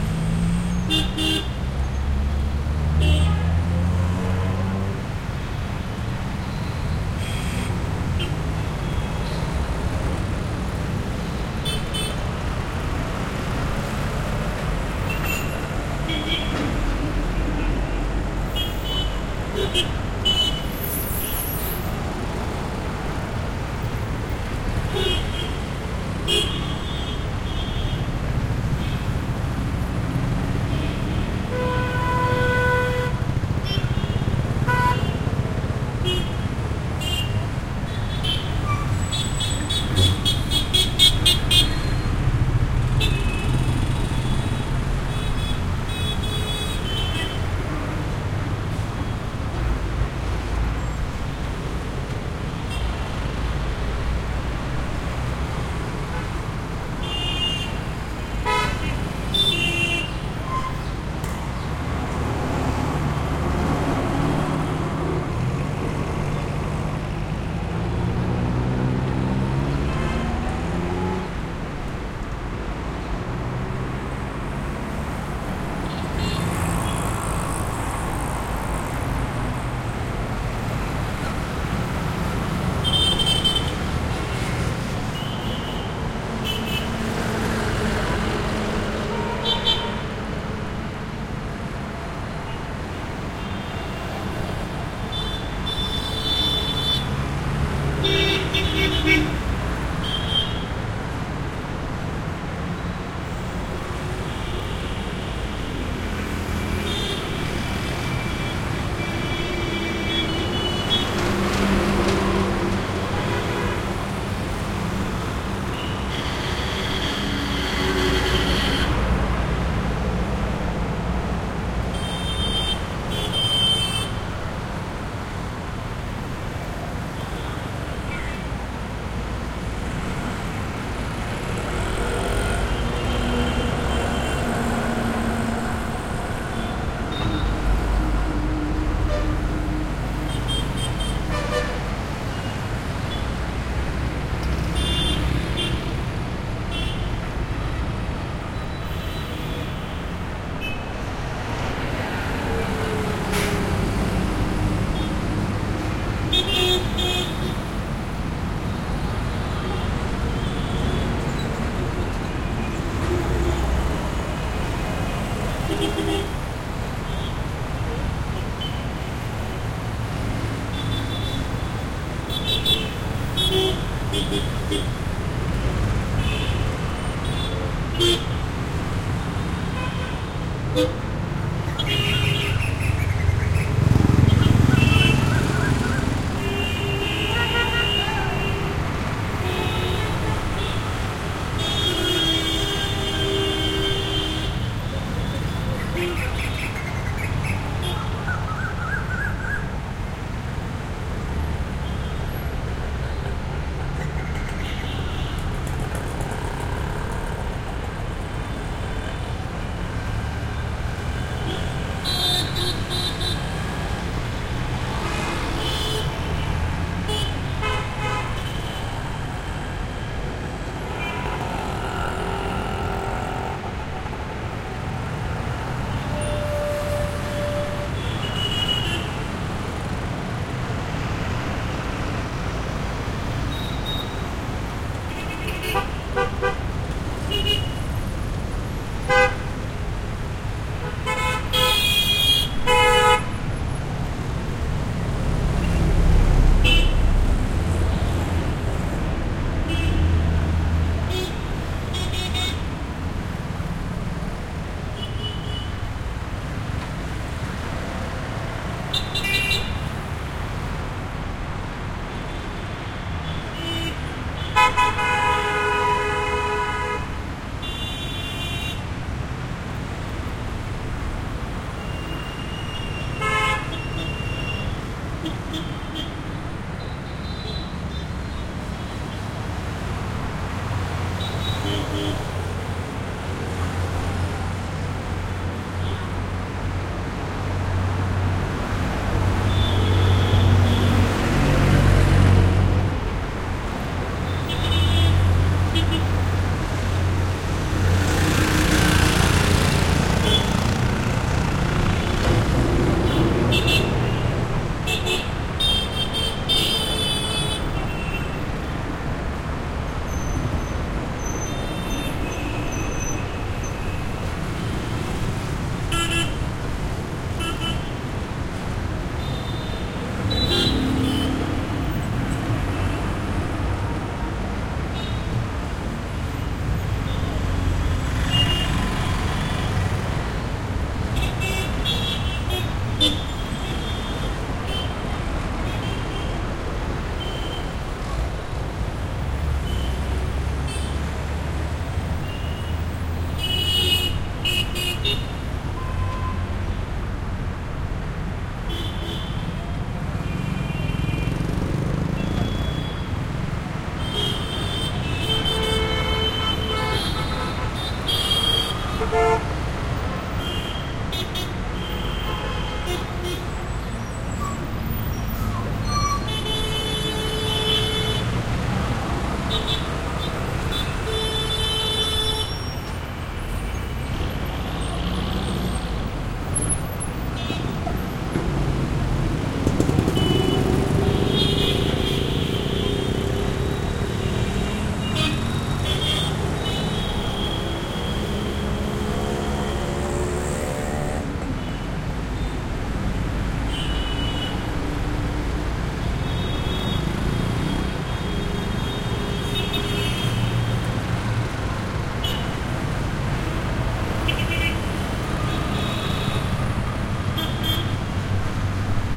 Bangalore, India, Sadashiva Nagar, 2014.01.19
Field recording made on the 19th of January 2014 at a crossroad in Sadashiva Nagar Bangalore, India).
I was not parallel to the road, hence the vehicles don't go straight from one side to the other side, the sound is more dense than with a right-left/leftright movement.
There is one bird singing sometimes.
Recorded with a Zoom H4.
field-recording, india, street, ambience, cars, horn, city, bird, noises, motorcycles, traffic, honking, crossroad, bangalore